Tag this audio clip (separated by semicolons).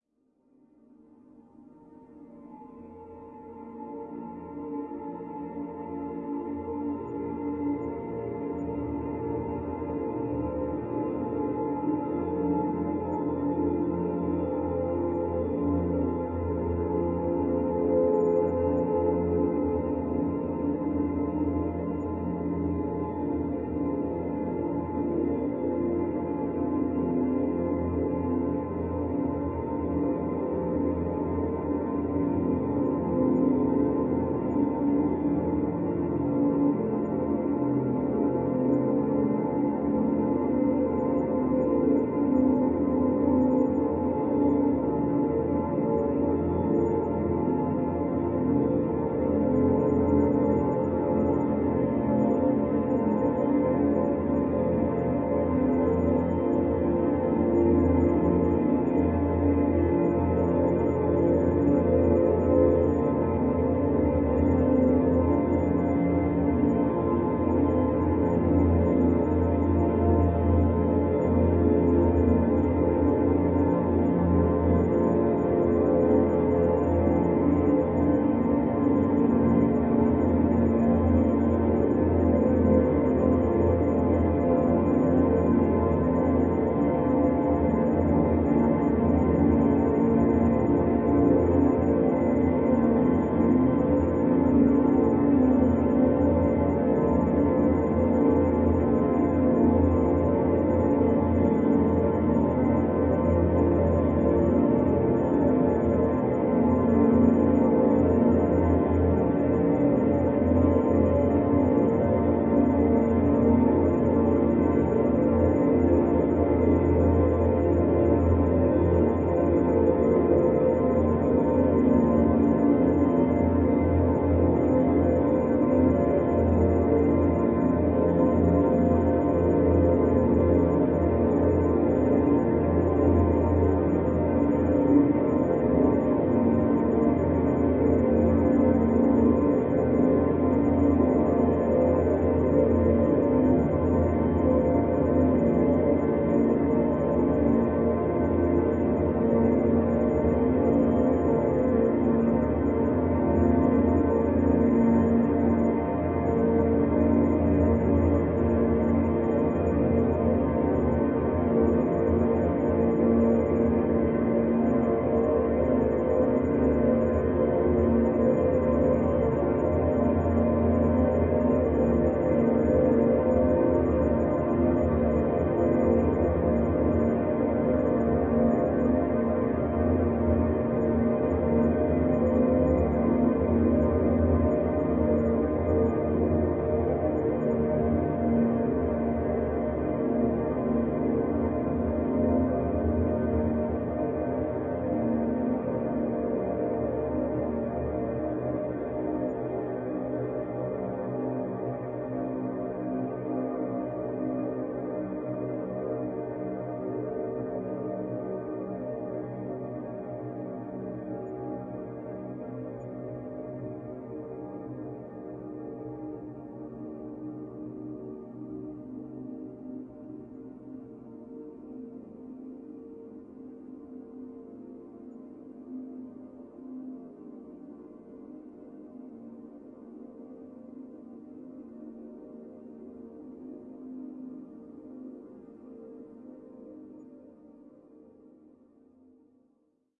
evolving; experimental; pad; menacing; soundscape; drone; multisample